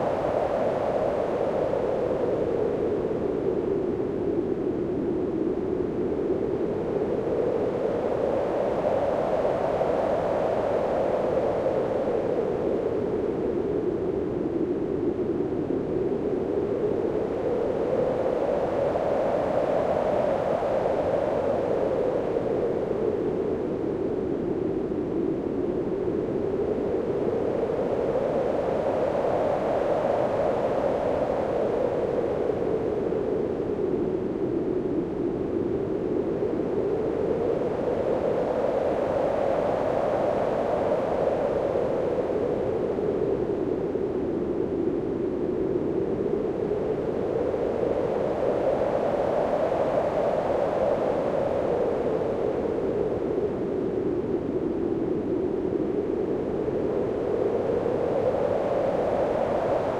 This is literally just white noise with a Wahwah effect on it. I discovered it by accident mucking around in Audacity; it sounds like howling wind through trees or wires or something.
Made in Audacity 10/06/2020.
gust, storm, howling, whistle, polar, windy, gale, whistling, wind, cold